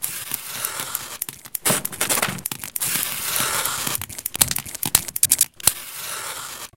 Soundscape EBG Group3
Escola-Basica-Gualtar, Portugal, Soundscapes